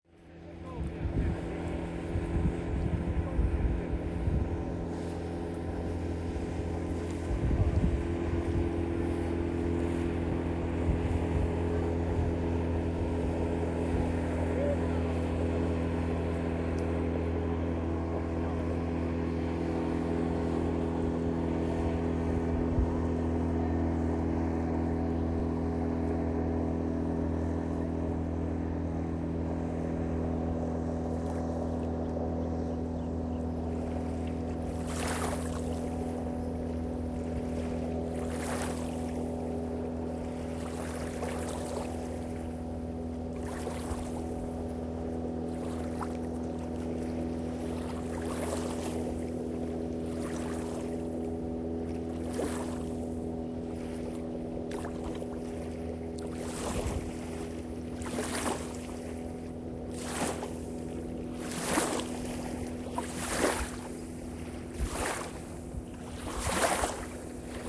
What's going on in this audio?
Motor Boat Sound Effect
Small Boat Engine
Hi friends, YOU ARE INVITED to check out the video of this sound
machine, boat